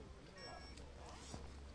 edited from soundwalk on sea walking site, mobile phone plus zipper
ika, lungomare, phone, rijeka, zipper